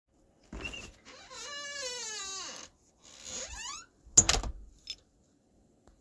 Opening and closing door